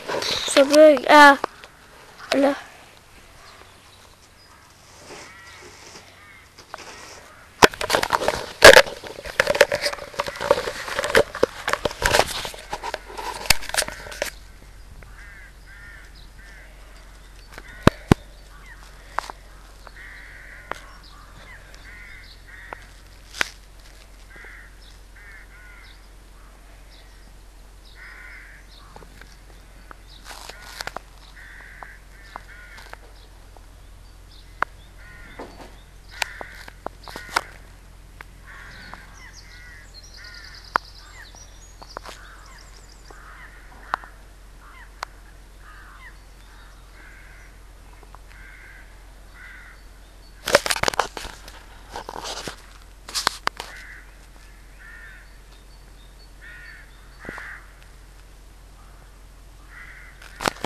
Field recordings from La Roche des Grées school (Messac) and its surroundings, made by the students of CM1 grade at home.